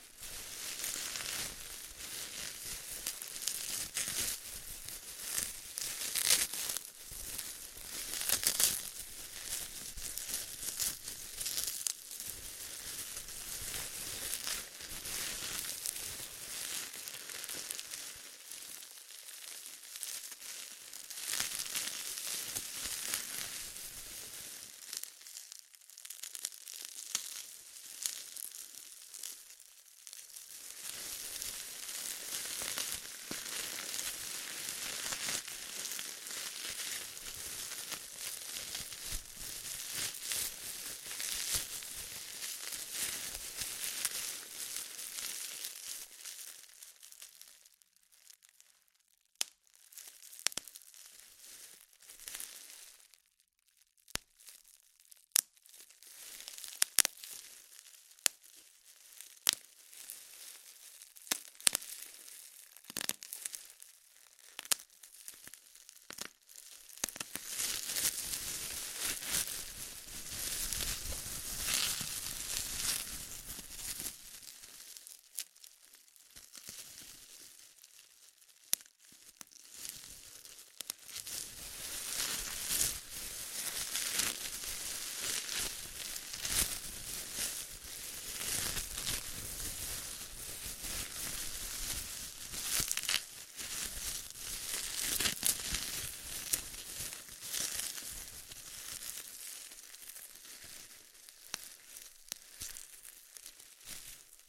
20180129 Bubble wrap
bubble, onesoundperday2018, plastic, wrap